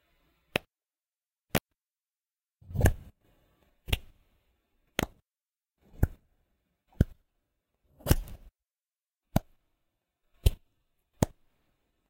Muffled Hit Claps
A series of muffled hits made with my hands clapping. Recorded with a Blue Yeti USB microphone.
hand, clap, slap, tap, impact, hit, muffled, skin, whack